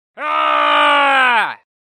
human roar 1
Roar recorded outside with noise removed
roar, scream